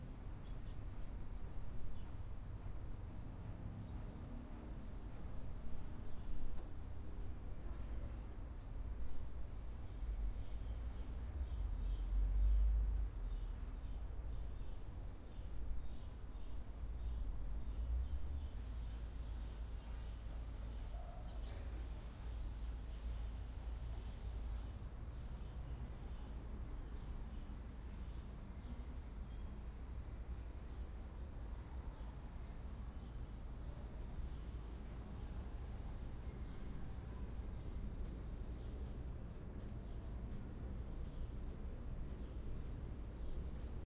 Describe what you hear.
City backyard, some birds with mild wind.
Outdoors, Birds, city, Exterior